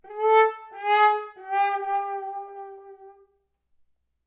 horn fail wahwah 3
A "wah wah" sound produced using the right hand in the bell of a horn. Makes a great comedic effect for "fail" or "you lose" situations. Notes: A4, Ab4, G4. Recorded with a Zoom h4n placed about a metre behind the bell.
horn, wah, trombone, fail-sound, fail, lose, you-lose, sad-trombone, french-horn, sad, wah-wah